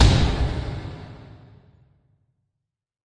One of my first at attempts to make an impact sound by layering.
I should have picked better source material.
I used some recordings of closing a door.
I cut and processed the sound in Cubase 6.5.
It's always nice to hear what projects you use these sounds for.